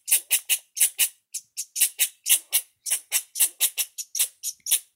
Angry Squirrel on Deer Mt
We startled this little guy coming down Deer Mountain yesterday.
He was no happy!
forest, nature, field-recording, squirrel, angry